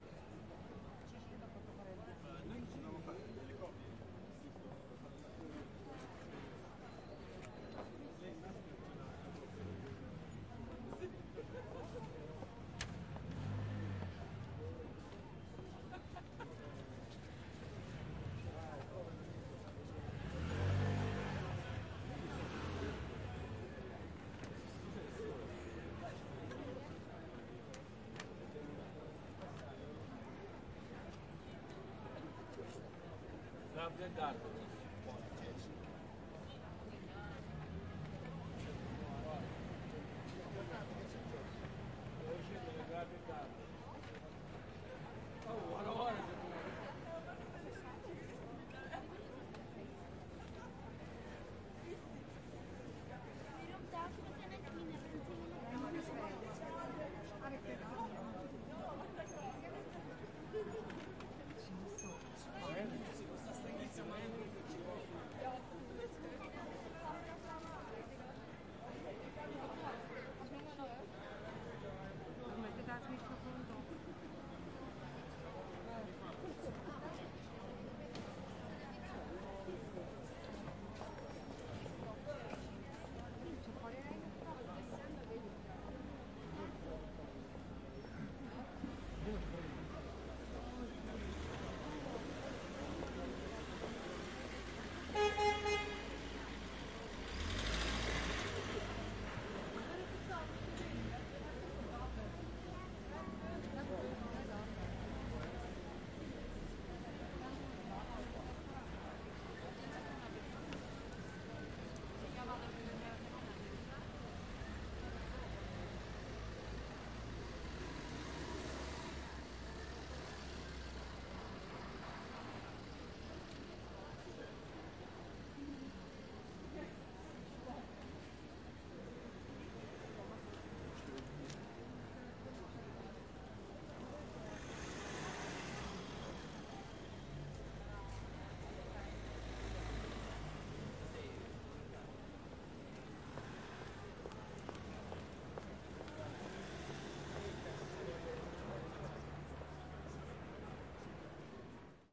Ambience Rome Via Condotti 02
Ambience, Condotti, pedestrians, Rome, Via